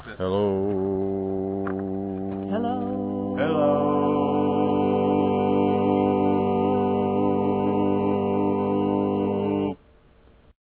Excellent if lo-fi recording of three voices, two male and one female singing and building one note at a time a major chord triad, saying, "Hello," thrice. I use it as a ring tone. Recorded with an iPod and Griffin iTalk mic.